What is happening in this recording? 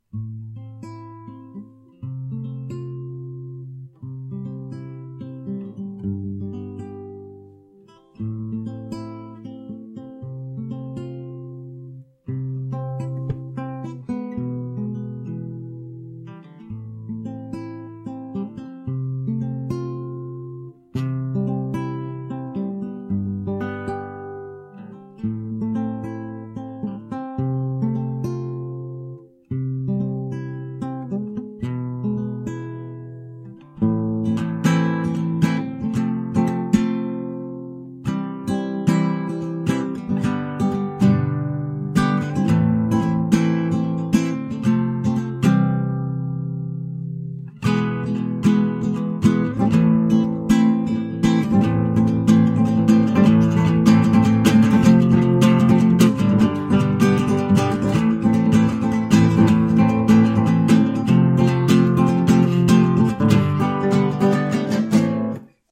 Progressive Random
This is a solo classical guitar song. It starts off with slow pitching and then finishes on a fast chord based rhythm.
chord, open-chords, clean, chords, rock, guitar, acoustic, nylon-guitar, progressive